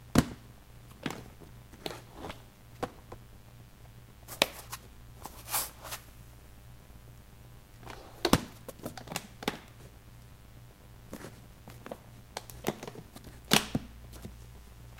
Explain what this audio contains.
Me opening and closing the B1 microphone case and adding a signature snap close at the end for all your small case opening and closing needs.
open, case, snap, microhone, latch